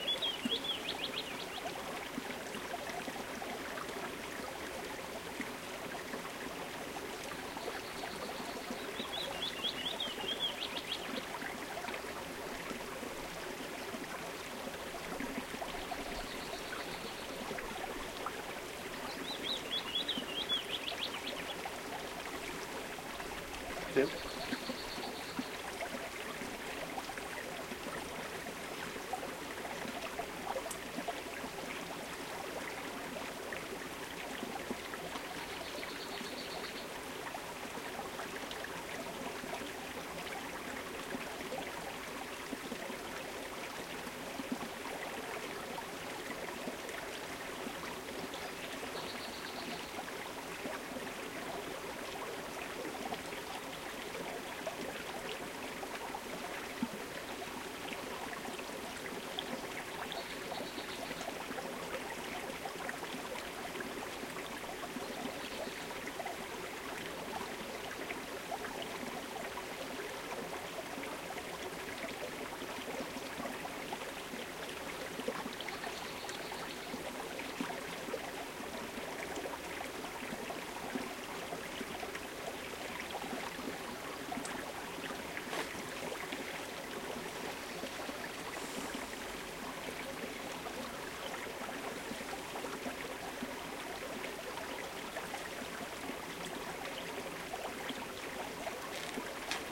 Bubble, murmur of small stream in Sweden,with birds (02)
Field-recordning of an actual stream in the countryside of Sweden, with bird song.
Recording-date:Unknown. Sony digital equipment (16 bit) and a Sony stereo-mic (ECM-MS 907)